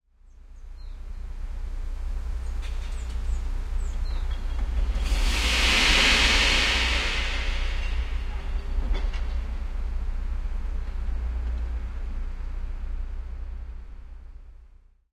680e AB wide KRAKOW truck collecting glass garbage on Monday morning 20-08-31 078
Garbage truck smashing glass from distance in a concrete neighborhood
glass, smash, garbage